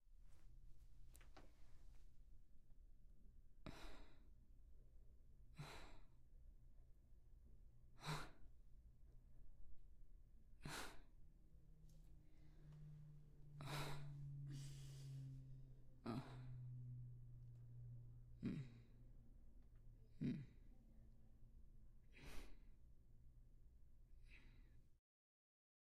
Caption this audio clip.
38-Murmuring Sadness
woman, Murmuring, girl, Sadness